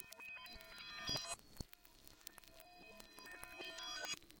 pin glitch1
abstract, musiqueconcrete